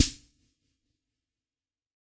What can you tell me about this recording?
D85 Conga Mid-bounce-1
Raw sample of isolated drum sound without any alteration nor normalization.
Drums, Organ, YamahaD-85